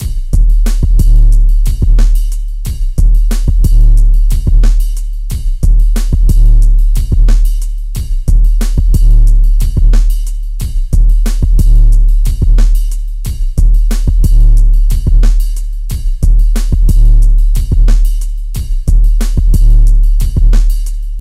sewer cap2 90bpm
dragging bassline, good groove, produced in reason......